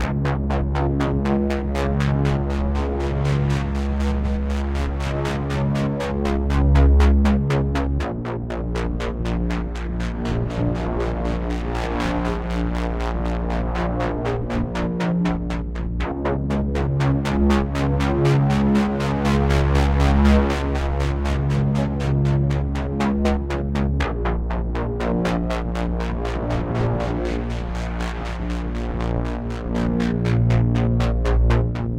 Seamless suspense music loop and bassline for dark thrillers, sci-fi films and indie videogames, codenamed as J8 ("Jay Eight") by myself.
I coupled the following VST's to create this sound:
~ (Ins) BALLTEARER for generating a dark oscillating sustain bass
~ (FX) killerGATE+ for filtering the bass through a rhythmic gate
~ (FX) NI METAVERB for adding a subtle reverb "presence" in highs
~ (FX) NI MAXIMIZER for boosting the audio and adding more depth
~ (MASTER) final audio mastering (volume, frequency range, etc.)
Audio produced and recorded with NI MASCHINE software
and converted down to 16-bit for smaller filesize.
or import as a sample in FL Studio, Synapse Orion, NI Maschine, etc.
Check also my Novakill VST Review Topic.
ambience, ambient, atmosphere, balltearer, bass, bassline, dark, electro, electronic, killergate, loop, mastering, maximizer, metaverb, music, novakill, rhythmic, seamless, suspense, synth, thriller, vst, vsti